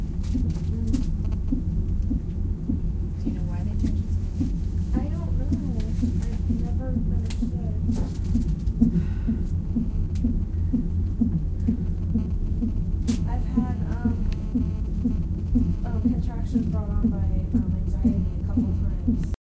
baby heartbeat 0414 3
Sounds leading up to the birth of a baby recorded with DS-40.